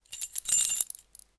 keys rattle4
Rattling bunch of keys being taken out. Recorded with Oktava-102 mic and Behringer UB1202 mixer.